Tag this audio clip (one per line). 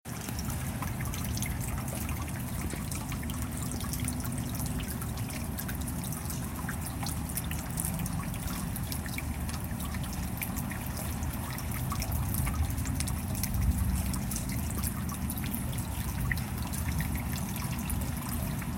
rain
drops